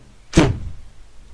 Energy impact 3
An energy effect inspired by anime Fate/Zero or Fate/Stay Night series.